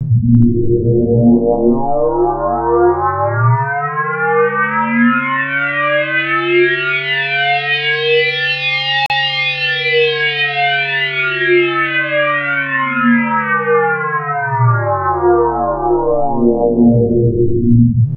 Robotic start up and shut down
This sound was originally created bu using a trigonometry algorithm that formed a ascending and descending wave, after some spectrum buffs and some volume manipulation this is the out come
machine, retro, shut-down, robotic, android